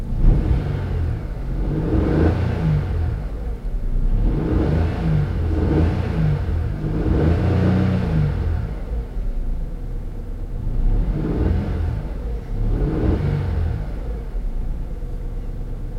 Acceleration car, recorded from inside the vehicle.